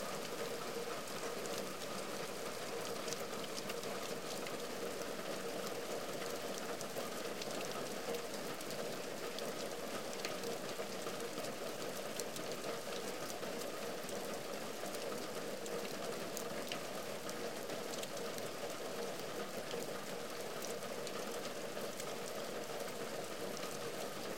Raining on roof
Raining on the roof.
rain, storm